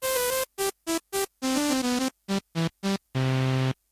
short bach melody
This is a small extract of a bach piece, cant remember what its called. It was created on a novation supernova II which is a analogue sound modelling digital synth. The patch is very simple, a distorted saw wave with a slightly delayed attack mixed with white noise.
mono, synth, Bach, sawtooth, short, wendy-carlos, supernova-II, monophonic, noise